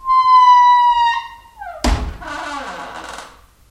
Door creaking 04
close, closing, clunk, creak, creaking, creaky, door, handle, hinge, hinges, lock, open, opening, rusty, shut, slam, slamming, squeak, squeaking, squeaky, wood, wooden